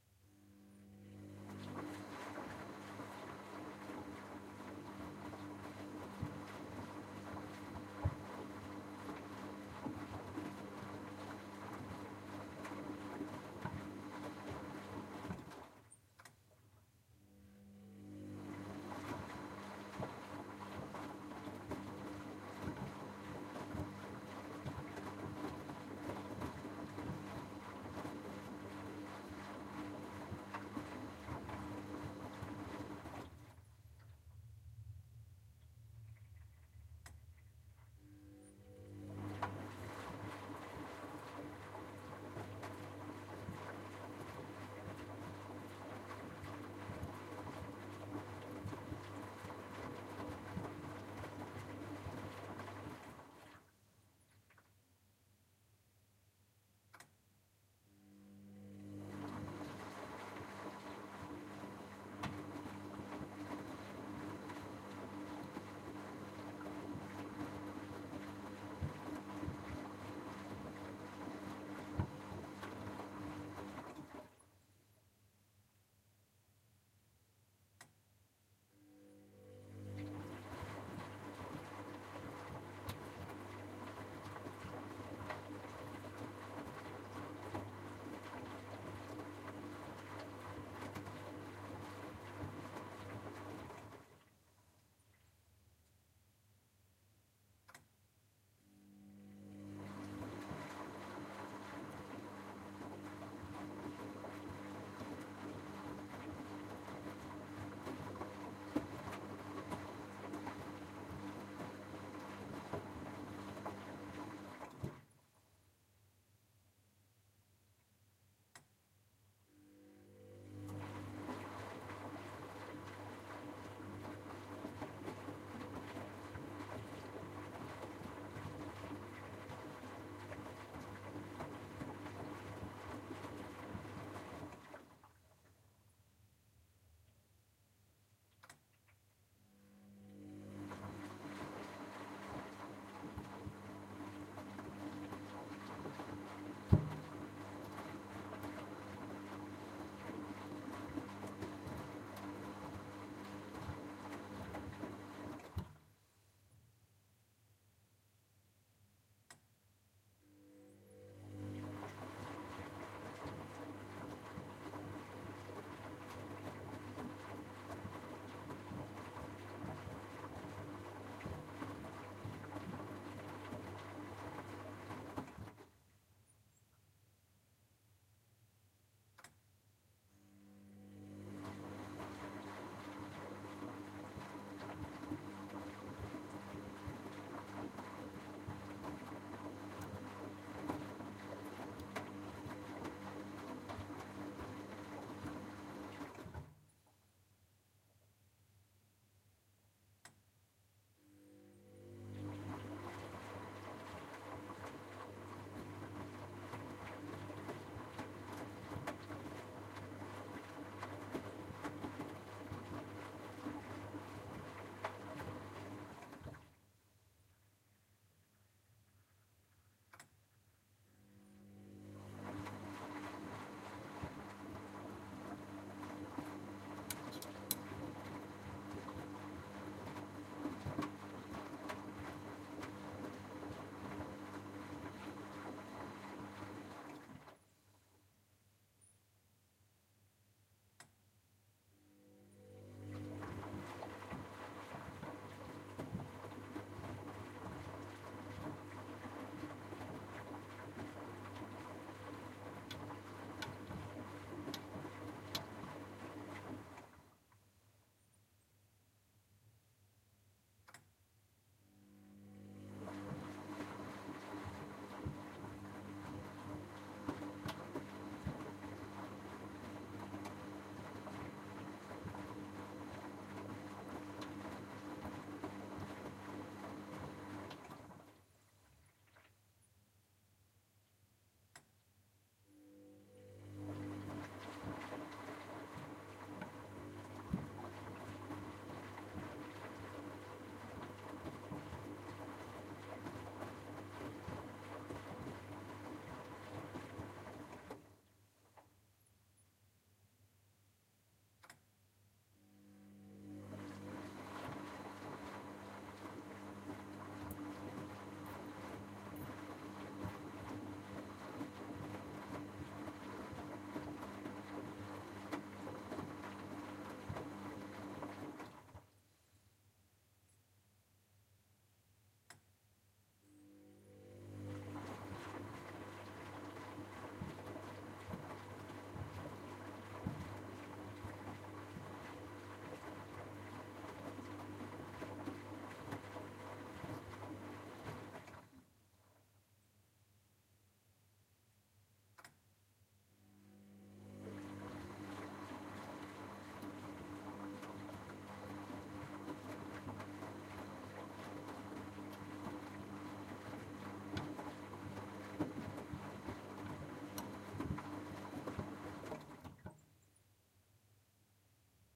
washing machine washing 2
machine, 2, washing